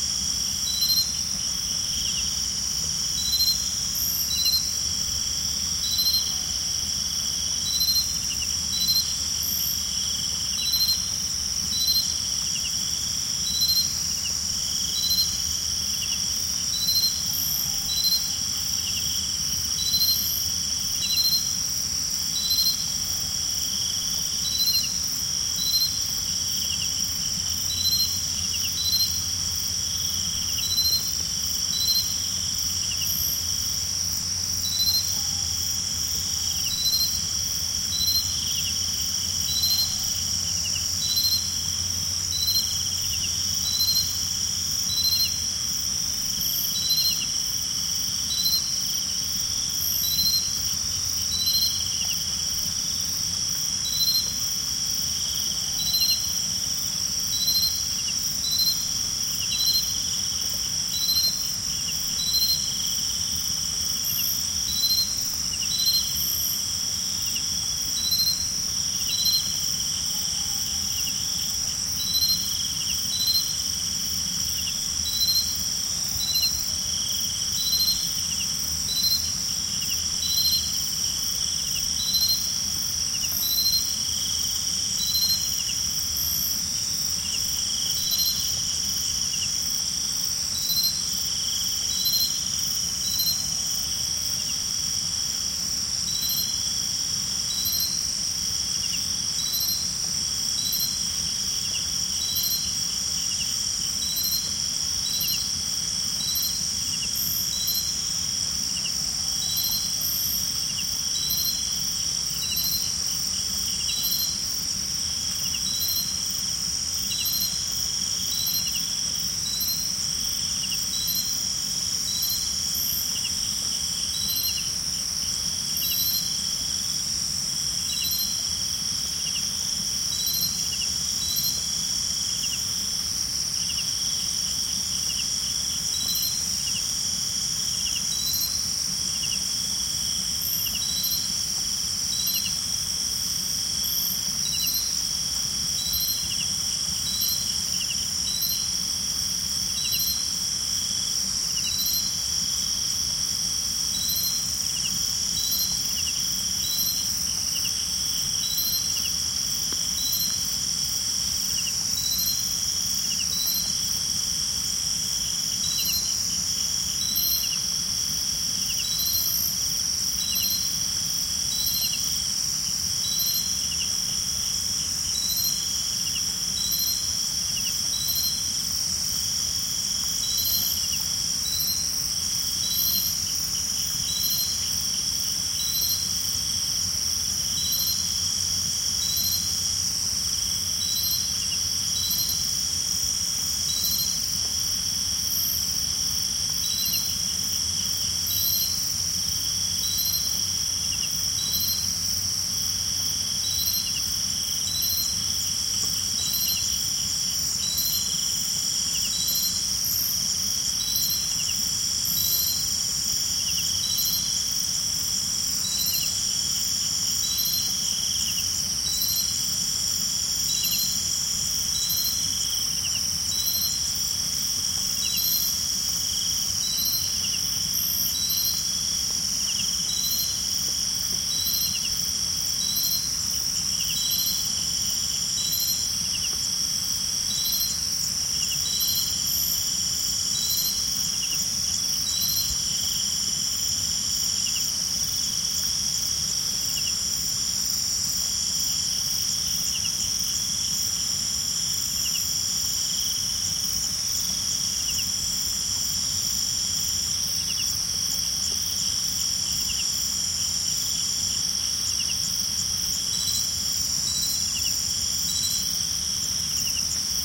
140930 night jungle nature ambience.Chiangmai Thailand. Cicades. Dogs (ORTF.SD664+CS3e)
ambiance, cicadas, dog, field-recording, nature, thailand